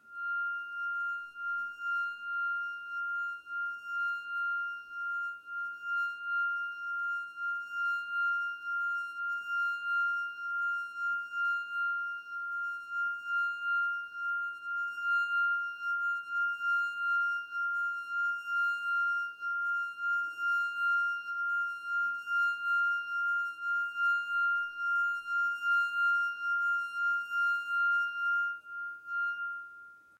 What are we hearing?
crystal frotte3-fa
My grandmother's cristal glasses, rubbed with a wet finger. It sounds. Stereo recording.
crystal, glass, soft